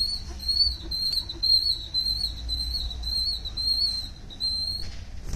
This is actually a cellphone recording of an automatic sliding supermarket door badly in need of lubrication. It sounds very much like a rat. I used it in a stage production of "The Pit nd The Pendulum."
metal, metallic, Rat, rodent